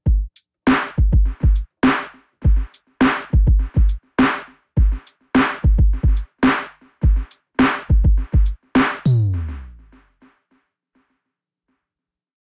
Jarbie Drum Intro
Playing around in Ableton Live 10 Lite, with my Alesis Recital Pro and iRig Keys midi devices. Might make a great podcast intro. Drums are "Jarbie Kit" in AL10L.
alesis, beat, drum, intro, introduction, irig, jarbie, podcast, theme